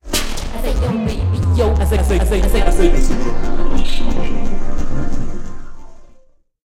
turn that shit off
turn, voice